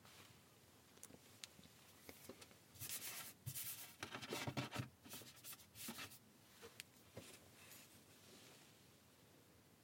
searching something in darkness - over wooden surface